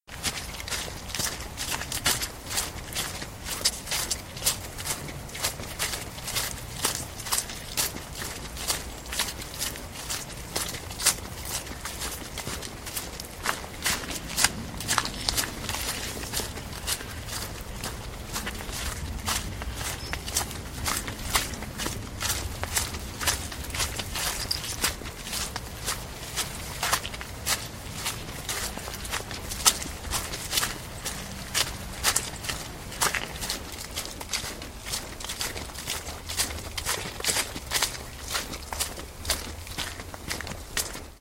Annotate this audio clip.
Footsteps, Muddy, B
Raw audio of footsteps in some squelchy mud.
An example of how you might credit is by putting this in the description/credits: